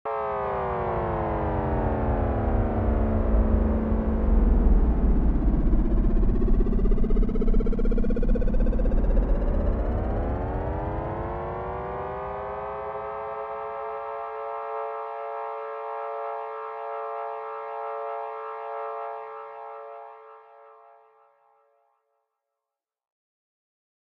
ufo sighting
An alien UFO space ship approaches and then fades off into the distance
alien, alien-abduction, space, space-shuttle, ufo